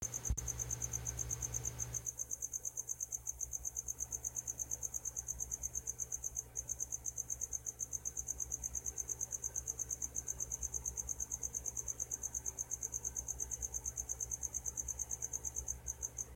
There's a cricket in my house. Decided to record it.
Recorded with a ZTE Grand X2.